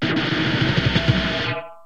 DJB 32 blast
Some Djembe samples distorted